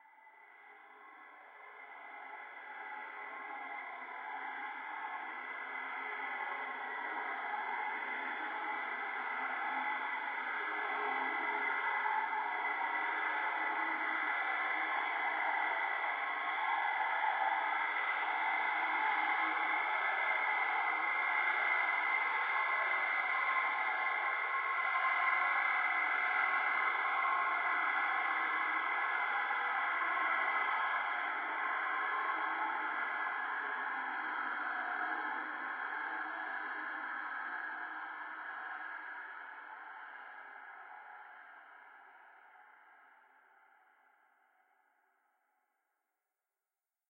Phantom Train lost in Tunnel
The abandoned subway tunnel lay dark, cold,restless with sounds of a phantom train forever screaching,and Searching for a station it cannot find.